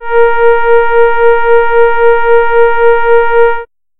An analog synth horn with a warm, friendly feel to it. This is the note A sharp in the 4th octave. (Created with AudioSauna.)